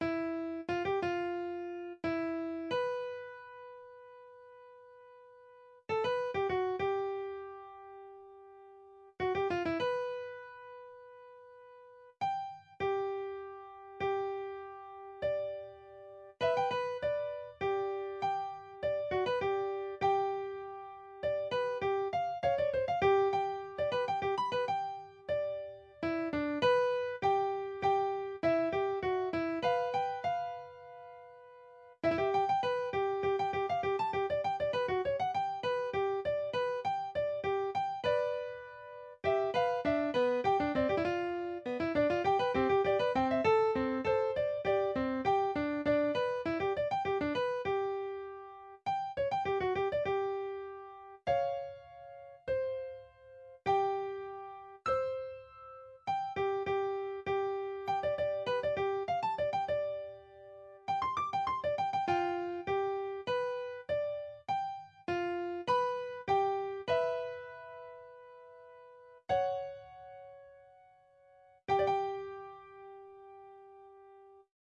My First Comp in a long time
bittersweet, brandi, hansen